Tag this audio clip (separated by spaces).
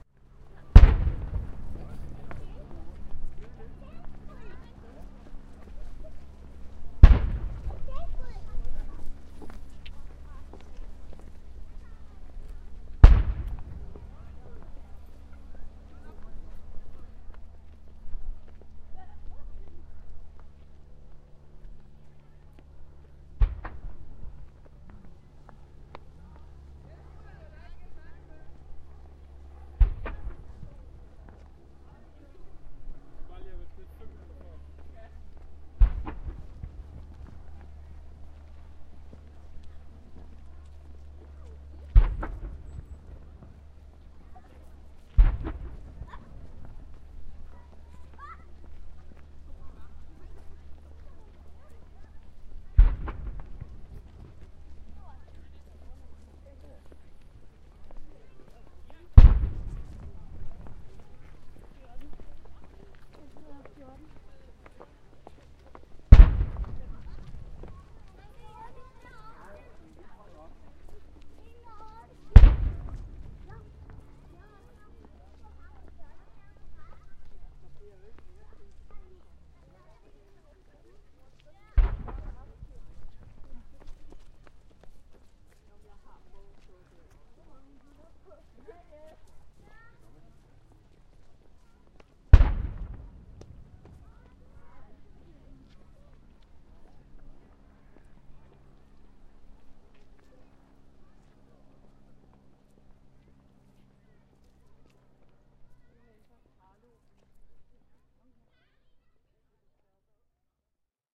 ambience field cannons